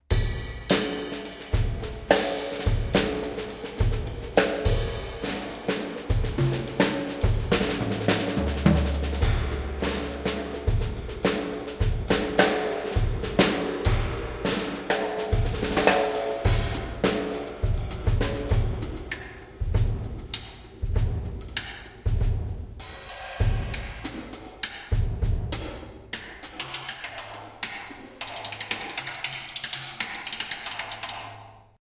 Drum Test-01
Just me noodling on the drumset in my studio. The only effects applied were a touch of buss compression and some eq.